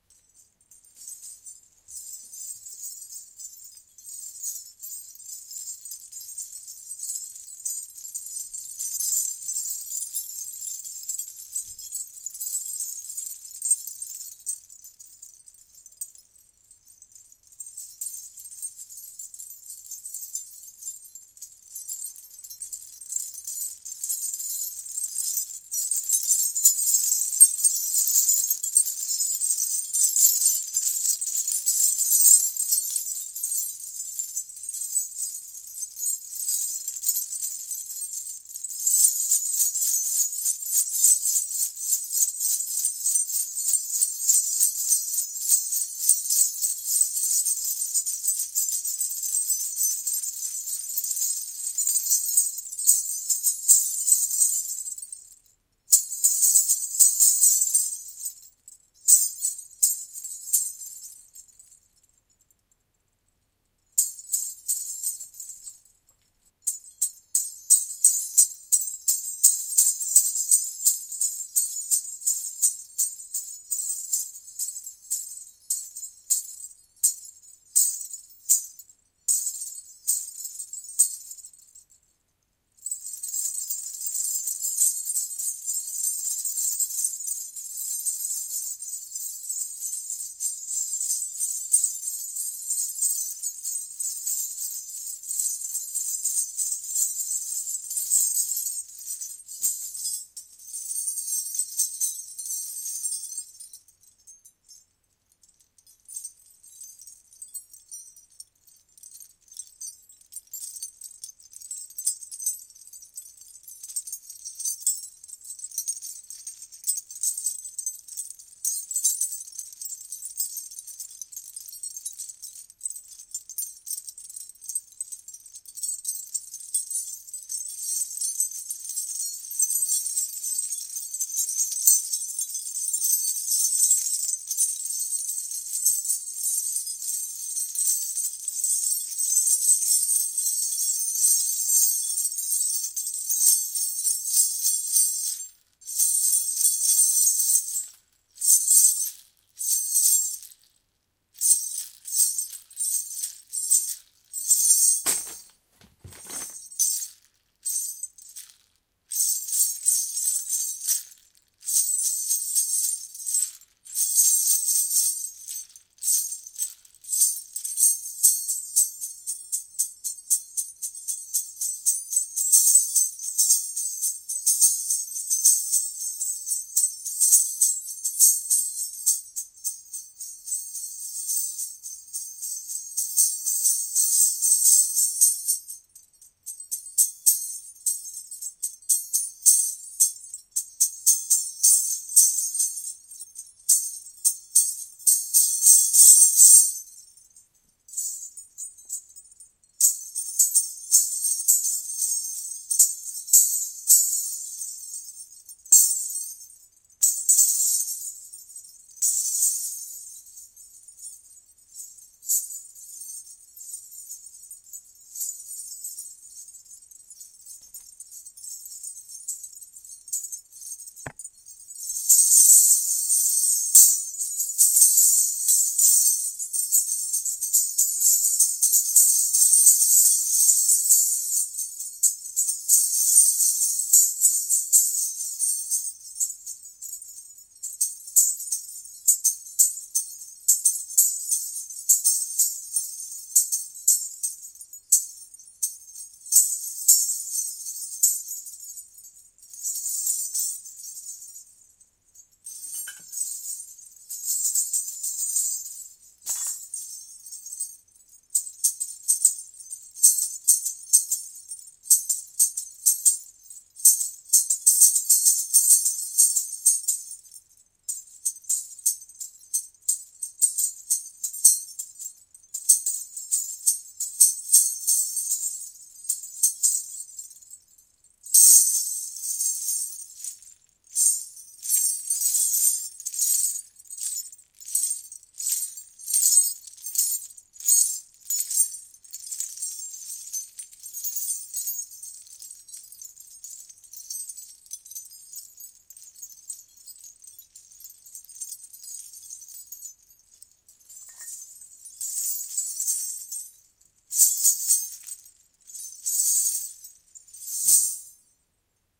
Indian Gungroos Ankle Bells Improv
Indian Gungroos (small bells) on an ankle strap, made for dancers to play percussion with their feet. I improvised while holding one, and later two, of them in my hands. I shook them, rattled them, moved them around, etc.
Recorded with Zoom H2n in MS-steroe.
metallic, spiritual, copper, shaking, bells, anklet, Indian, iron, metal, instrument, ghungroos, ankle-bells, salangai, bronze, percussive, ghungur, rattling, ghungroo, percussion, rattle, ghunghroo, ghunghru, ethnic, ancient, small-bells, indian-music